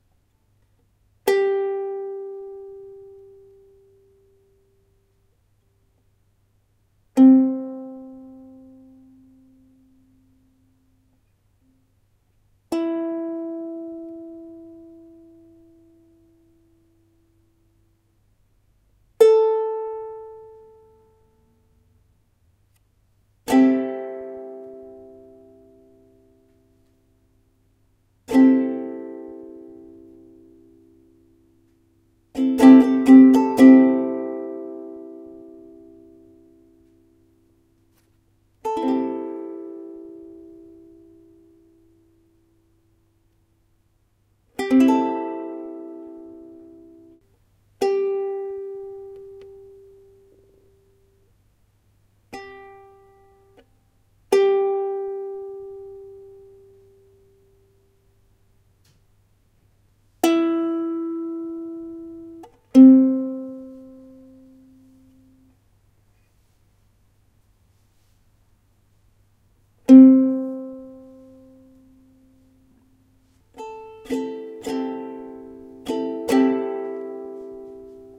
Ukulele Pling Plang
Making high-quality noises on the uke.
acoustic, chink, guitar, instrument, jangle, jingle, strum, thrum, tinkle, uke, ukulele